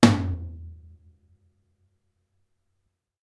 Gretsch Catalina Maple tom. 12 inch.